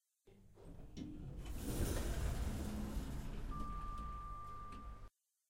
An alevator door opening.